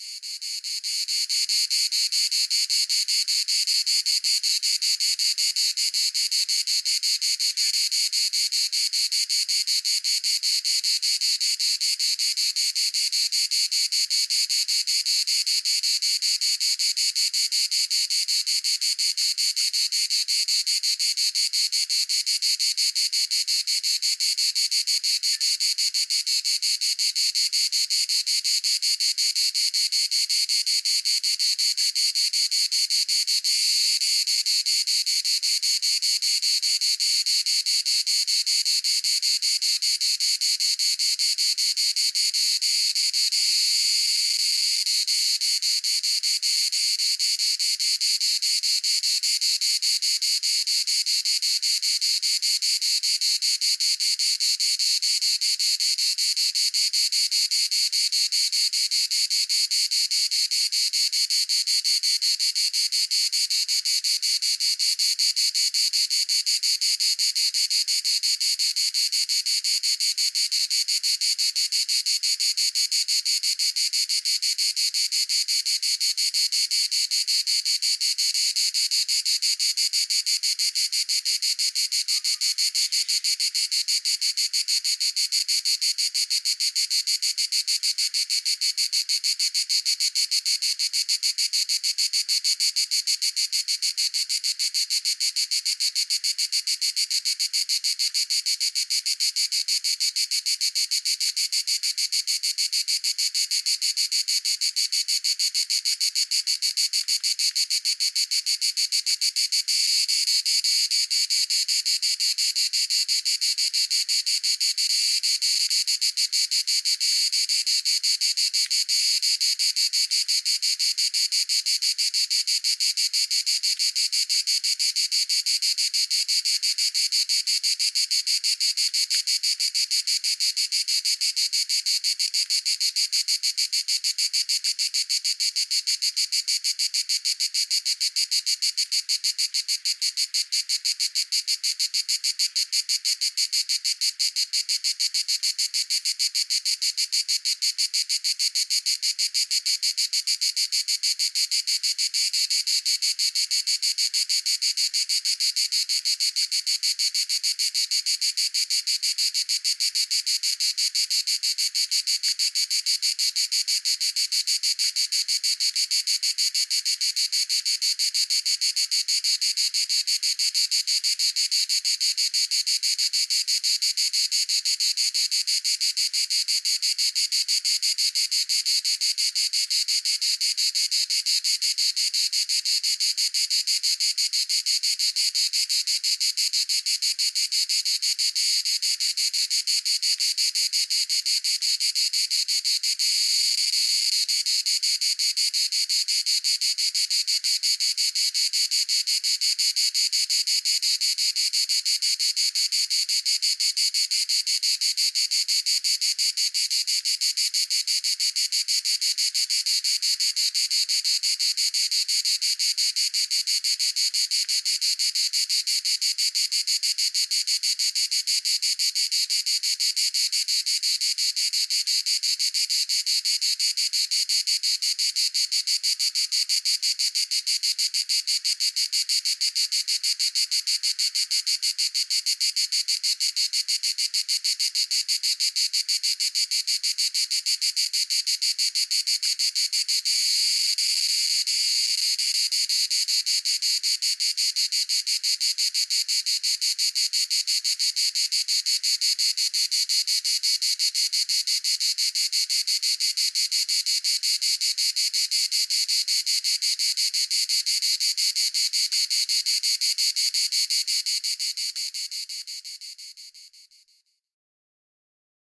Closeup recording of a grasshopper buzzing close up. Recorded in Skopje with Zoom H4n 2010.